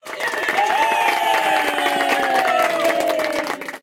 small crowd clapping with cheering
Recorded with IPad 2
applauding, applause, audience, cheer, cheering, clapping, crowd